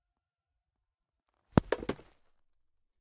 Heavy bag wooden floor 1
heavy bag falling on a wooden floor
heavy-bag, floor, wooden